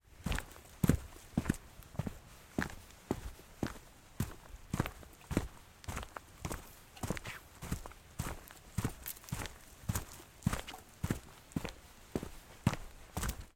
Hiking on Hard Ground 3
Sound of heavy footsteps on hard ground.
Recorded at Springbrook National Park, Queensland using the Zoom H6 Mid-side module.
feet, foot, footstep, footsteps, ground, heavy, hiking, step, steps, walk, walking